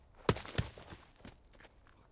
Gravel impacts 1
Two balls of gravel and dirt falling on hard floor. Medium impacts.
impact, dust, gravel, hit, dirt